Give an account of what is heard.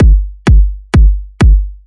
Kick Loop 3
Strong dance kick with a pronounced click.
[BPM: 128]
[Root: G1 - 49hz]
Drums, BPM, 128, ableton, Drum-Kit, Drum, Kick-Loop, Base, Kick, Bass, Loop